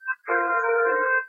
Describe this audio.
A short loop from AM channels on my radio.